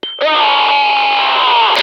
"AAAAAAARRRR!"
Originally recorded for a scene in a Starship Troopers Half-Life map that was never released. These are supposed to be soldiers talking to eachother after first landing on the surface of the bug planet.
The voice actor is myself (Josh Polito).